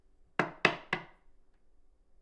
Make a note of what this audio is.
Pounding Cards On Table
Pounding cards on a wooden table to shuffle them.
card, cards, deck, fast, playing, playing-cards, poker, pounding, shuffle, shuffling, table, wood, wooden